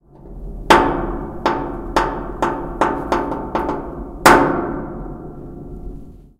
knock on the metal lattice
field notes, sounds of metal crashes